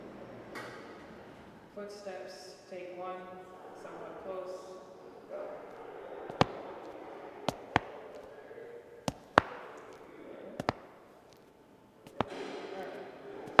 Stairsteps inside the school.
footsteps, inside, stairs